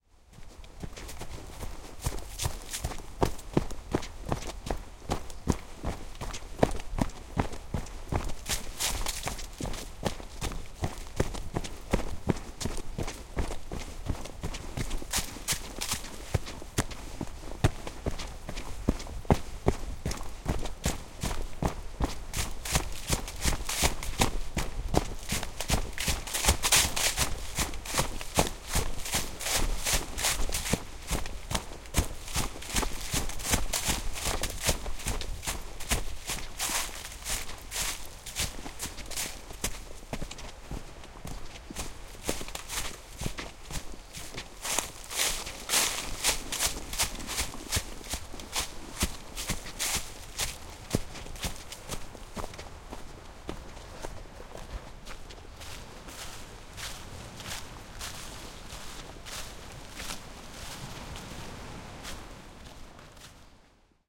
Running, on path through forest, dirt ground, leaves, footsteps, NOTL, 2011
On dirt path with leaves in forest, running, Niagara-on-the-Lake (2011). Sony M10.
footsteps, forest, running